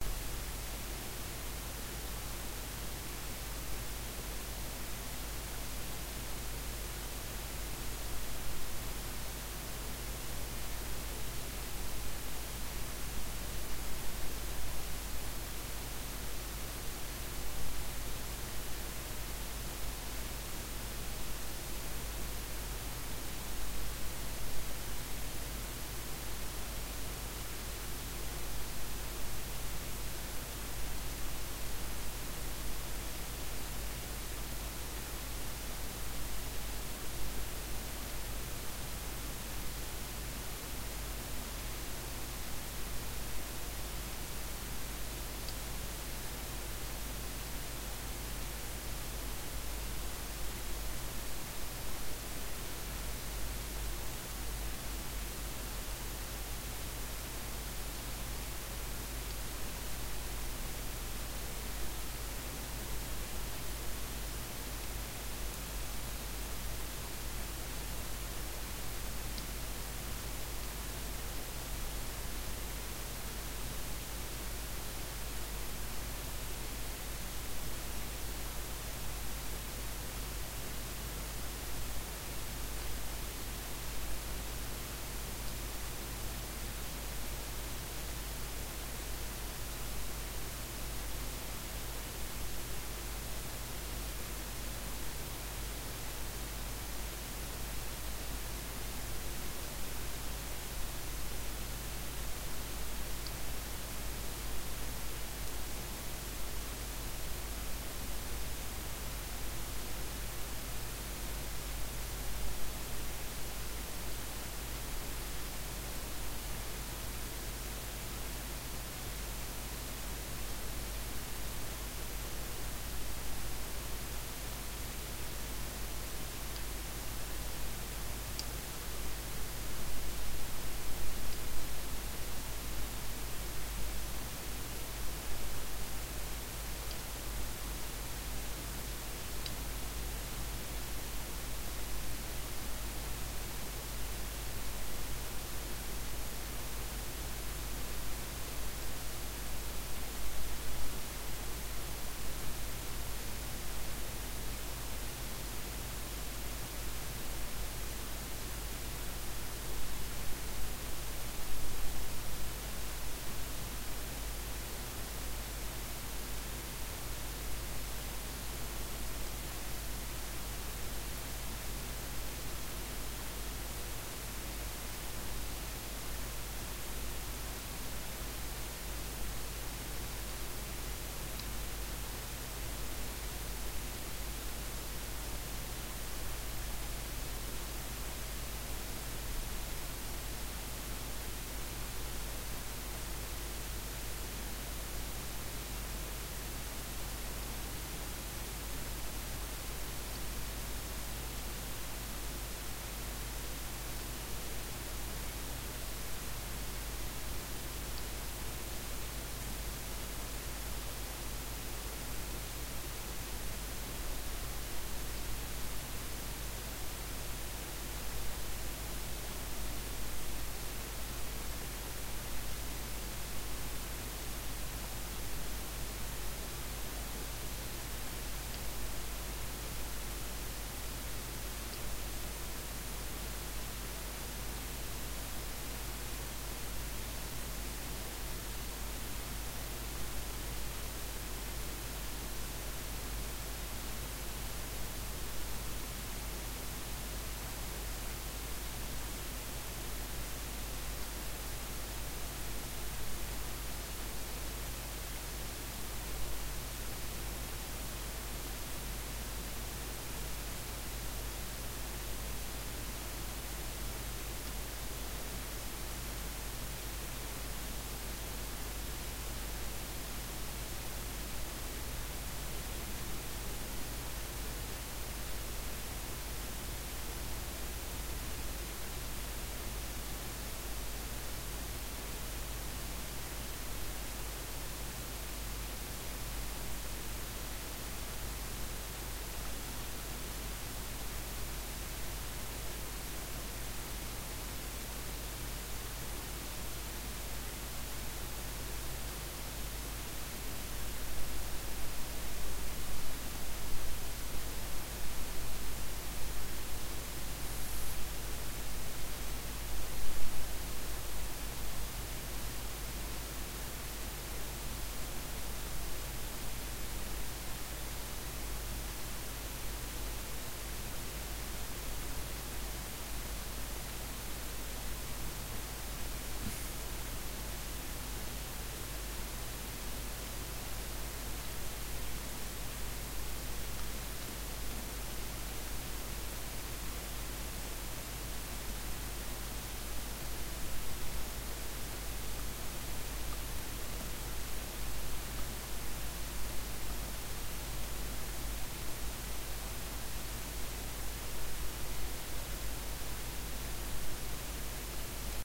ECU-(A-XX)109
Engine Control Unit UTV ATV Fraser Lens Mint Raspberry Alveolus Rack Reptile Dolphin Shelf Trail Path Channel Efficiency Mirror Iso Symmetry Rheology Energy Battery Jitter Pilot Navigator Map Track Horizon
ATV, Efficiency, Reptile, Rheology, Control, Lens, Fraser, Battery, Track, Mirror, Jitter, UTV, Iso, Mint, Alveolus, Dolphin, Path, Raspberry, Rack, Unit, Shelf, Channel, Trail, Horizon, Map, Engine, Navigator, Symmetry, Pilot, Energy